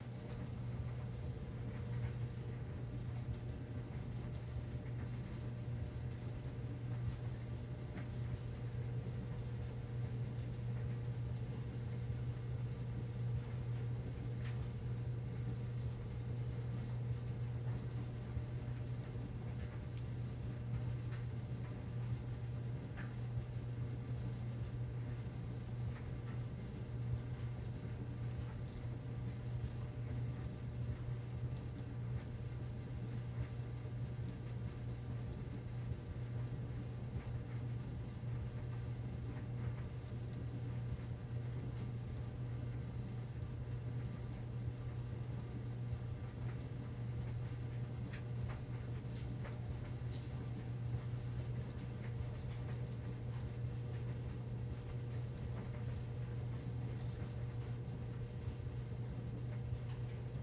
Active Clothing Dryer
An active MAYTAG CENTENNIAL Dryer on the "timed dry" setting, and "medium" temperature.
Recorded using a dreamGEAR UNIVERSAL ELLIE headset connected to an Android ZTE.
Appliance, Clothes, Clothing, Dryer, Loud, Machine, Rumble, White-Noise